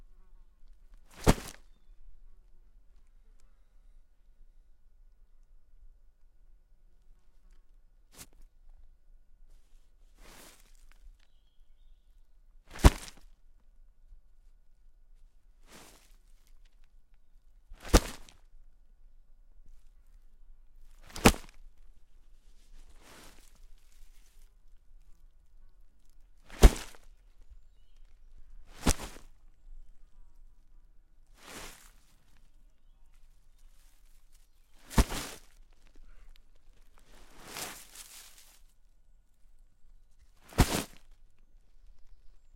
HEAVY BAG DROP DIRT
Heavy bag hitting the ground (mostly dirt and dry leaves). Some birds in the background. Tascam DR100 MkII. Sennheiser ME66.
bag, dirt, drag, drop, forest, ground, hit, impact, leaves, thud, woods